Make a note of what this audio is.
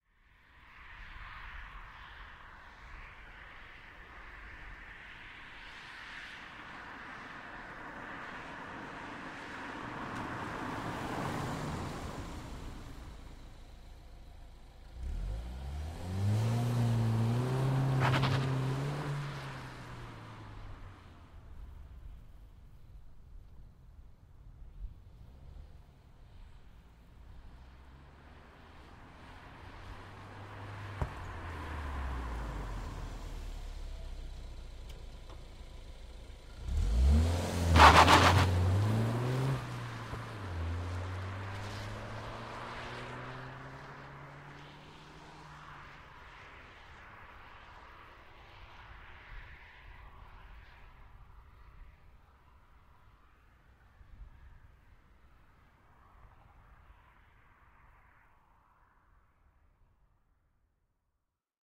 BMW 114i E87 Exterior Stop Reverse Acceleration Mono
This ambient sound effect was recorded with high quality sound equipment and comes from a sound library called BMW 114i E87 which is pack of 47 high quality audio files with a total length of 125 minutes. In this library you'll find various engine sounds recorded onboard and from exterior perspectives, along with foley and other sound effects.
114i, acceleration, bmw, car, drive, driving, engine, exterior, fast, motor, race, racing, reverse, slow, stop, tyre, tyres, vehicle